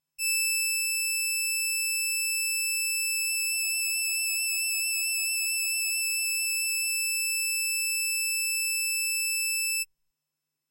Simple lead sound with clarity and some vibrato in the end. Sampled from a ATC-X in E5 key.
clear, lead